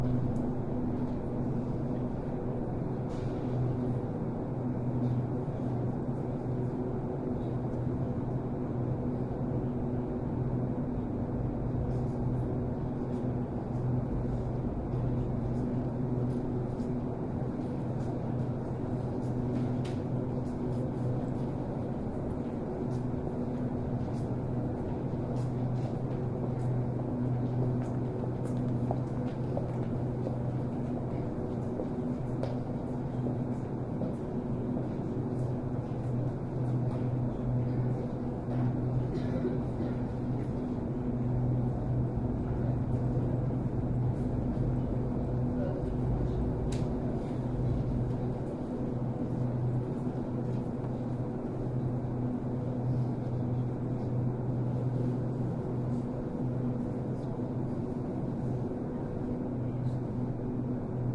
subway ambiance
special ambiance in a subway station, with some pitched background.
edirol R-1 built-in stereo mic